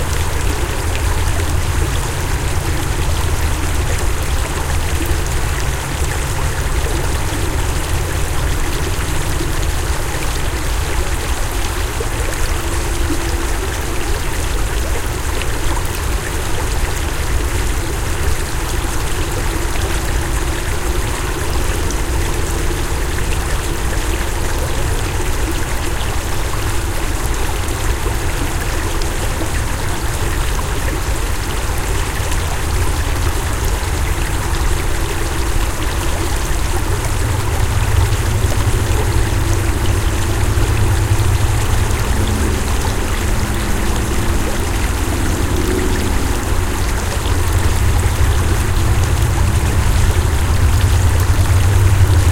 This is a recording of a small stream flowing through a culvert. This is in a wooded area near a busy interstate highway. The recording is stereo, made using the "T" microphone that comes with the M-Audio Micro Track recorder.
ambience, field-recording, water